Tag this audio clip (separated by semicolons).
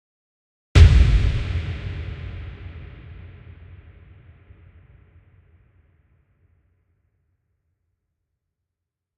oneshot; synthesized; hit; singlehit; synthesizer; explosion